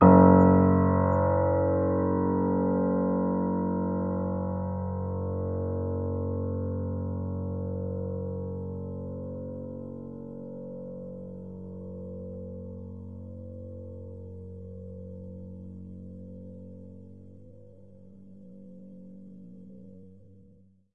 PIANO MED GB1
MISStereoPiano
These were made available by the source listed below.
You may also cite as a reference, link to our page from another web page, or provide a link in a publication using the following URL:
Instrument Piano
Model Steinway & Sons
Performer Evan Mazunik
Date November 5 & 27, 2001
Location 2017 Voxman Music Building
Technician Michael Cash
Distance Left mic 8" above center bass strings
Right mic 8" above center treble strings
piano, grand